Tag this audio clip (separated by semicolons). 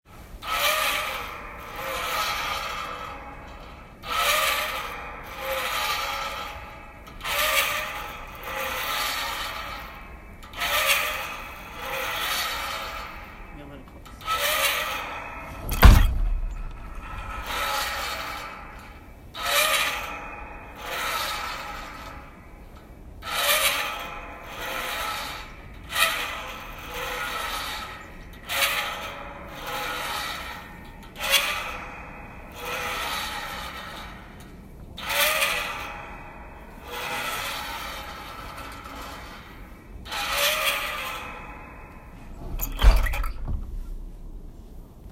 creak; creaking; creaky; door; door-creaking; hinge; rusty; squeak; squeaky